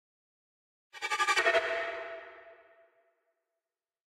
stk sound design,string with tremolo vst,reverb vst
ambient, deep, noise